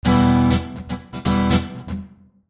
Rhythmguitar Emin P110
Pure rhythmguitar acid-loop at 120 BPM
loop, guitar, acid, rhythmguitar, 120-bpm, rhythm